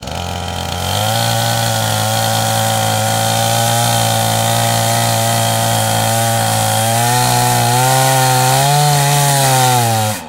Chainsaw Cut Slow
Field recording on an 18" 2-stroke gas chainsaw.
cut, motor, cutting, chainsaw, saw, gas, chain